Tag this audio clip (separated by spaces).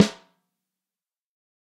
14x5 drum electrovoice mapex multi nd868 pro-m sample snare velocity